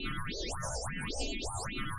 Sequences loops and melodic elements made with image synth. Based on Mayan number symbols.
loop, sound, sequence, space